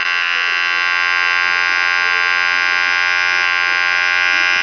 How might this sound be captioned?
This is me recording the sound of a hair clipper